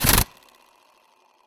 Pneumatic angle grinder - Fuji f7vh - Start 1a
Fuji f7vh pneumatic angle grinder started once, left spinning.
work, fuji, air-pressure, motor, metalwork, angle-grinder, 80bpm